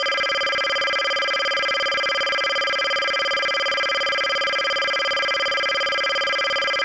OSD text 1
Have you seen films like Terminator, played games like StarCraft Broodwar and seen TV series like X files and 24 then you know what this is.
This sound is meant to be used when text is printed on screen for instance to show date / time, location etc.
Part 1 of 10
scifi, osd, text, long, futuristic, beep, simple, film